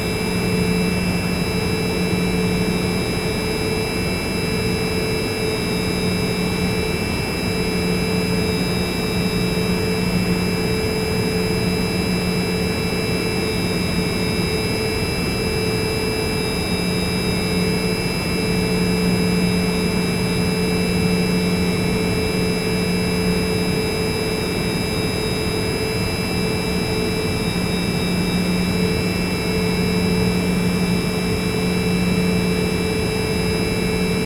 buzz hum electric industrial pump room
buzz, industrial, hum, room, electric, pump